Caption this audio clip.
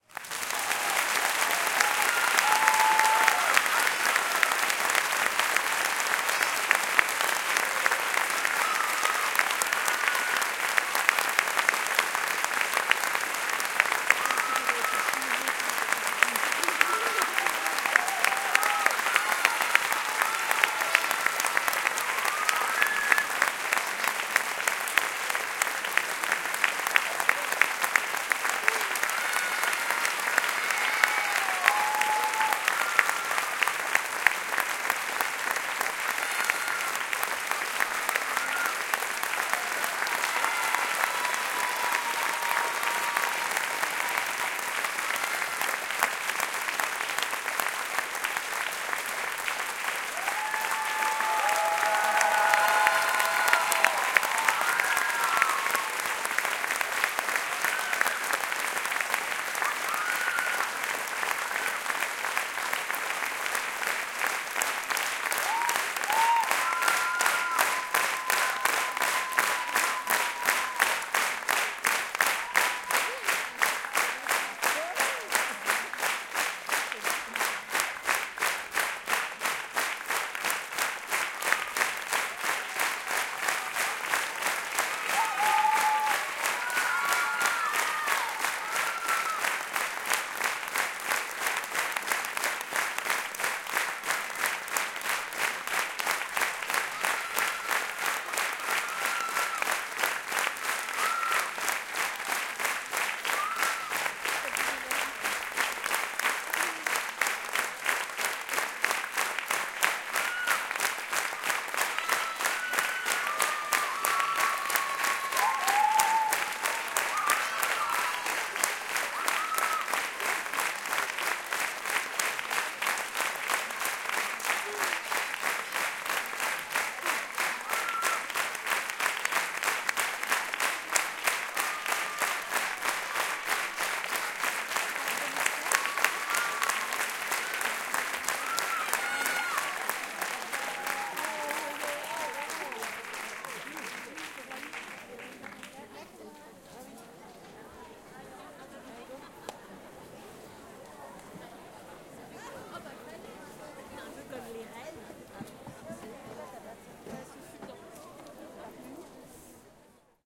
Enthusiastic audience cheering at the end of a show, recorded in a medium sized theatre hall located in Rueil-Malmaison (suburb of Paris, France).
Recorded in November 2022 with a Centrance MixerFace R4R and PivoMic PM1 in AB position.
Fade in/out applied in Audacity.